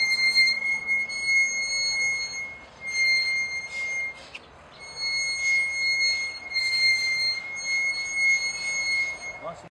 Metal buggy in the Parque de la Memoria de Río Ceballos, Argentina